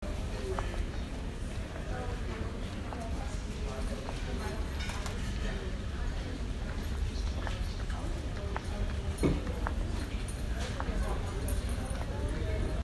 Random people chatter.
chatter, crowd, discussion, inside, people, talking, voices